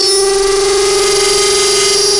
sort of triangle wave sounding minor dissonance...